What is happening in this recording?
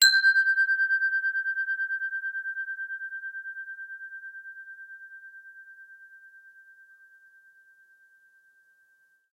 Single chime strike from metal garden chimes.
Low cut and X Noise already done 4 ya.
Rode NTK mic. Hard mallet.